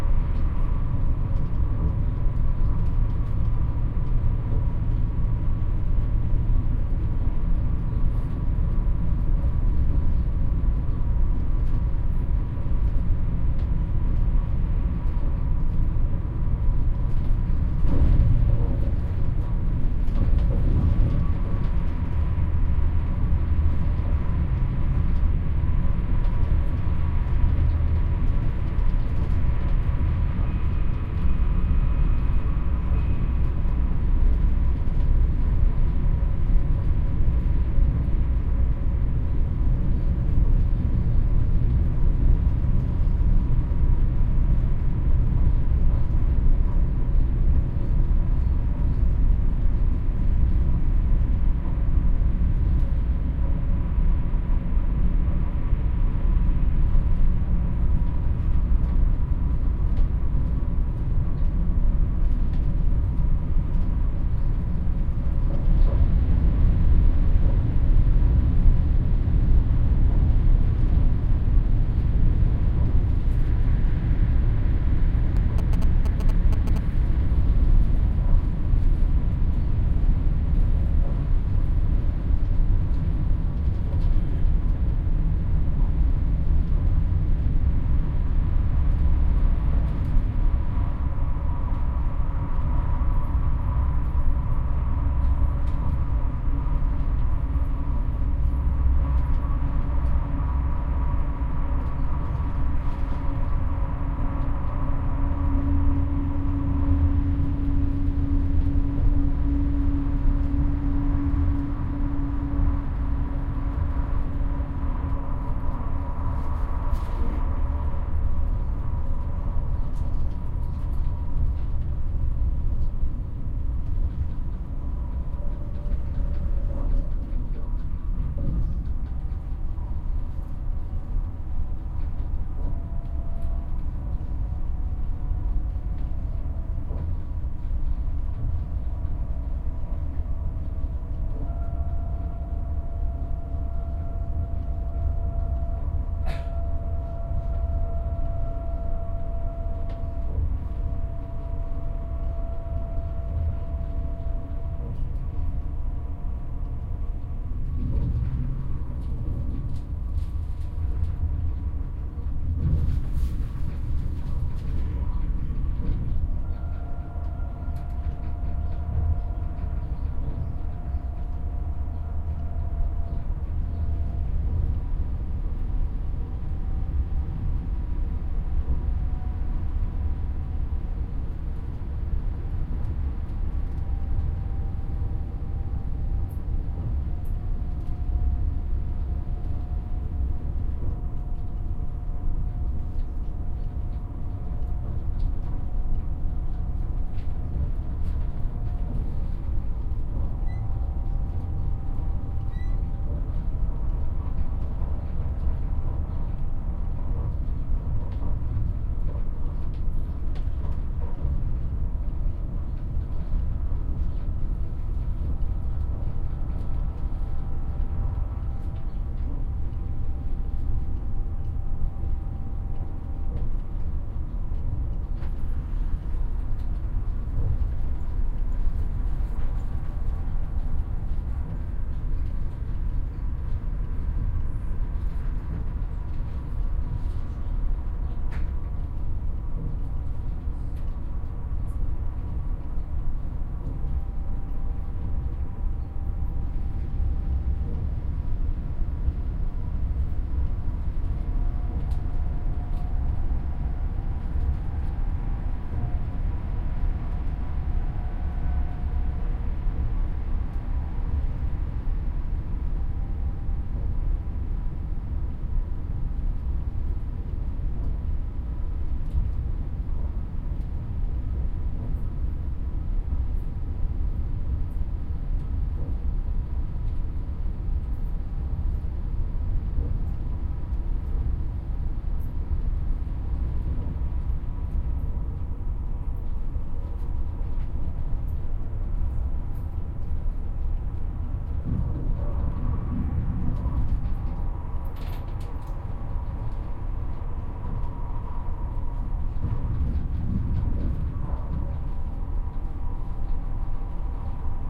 train journey
The sound of a railway journey inside a 1st class compartment of a german fasttrain. Inside microphones of a PCM-M10 recorder.
field-recording, train-journey, traintravel